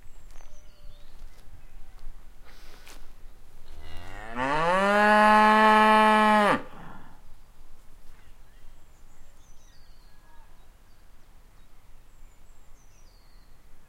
Cow moo #5
A very loud moo, expertly executed by the cow concerned, showing herself to be in particularly good voice on this fine day. Probably the best of the bunch in this sound pack.
cattle, countryside, cow, cows, farm, farm-animals, lowing, moo, mooing